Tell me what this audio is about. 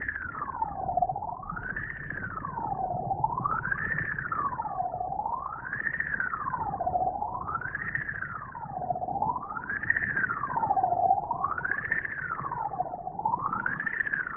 Underwater Police Siren
This was a recording I made on a Zoom H2 of a cat's purr, ran thru several filters until I had what I wanted, a repeating, ordered, police like siren underwater.
Police, Cat, distortion, Siren, Underwater, Purr